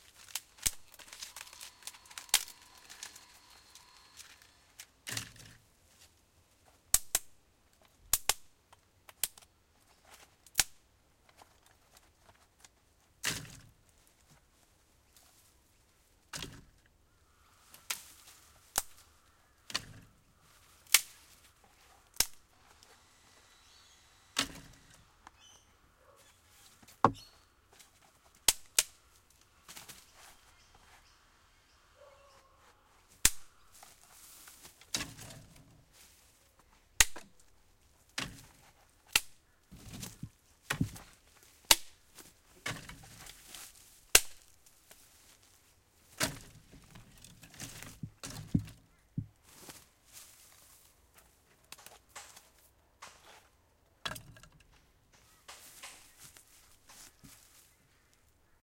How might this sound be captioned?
Snapping small twigs gathering wood
sticks, cracking, wooden, crunch, wood, branches, crack